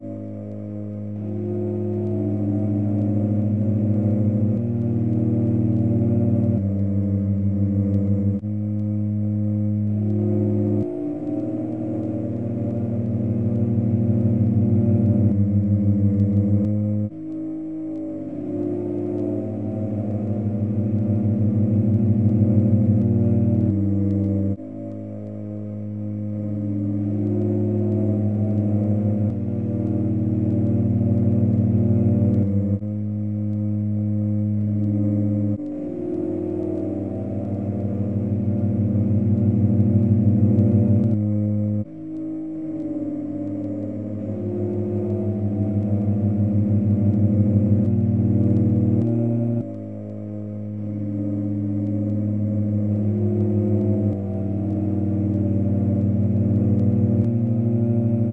the gift 0001
A file made by layering and lowering the pitch of the sample "harmonicsound" by ricemutt.